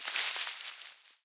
tree shake
bush, foley, foliage, leaf, leaves, rustle, shake, wind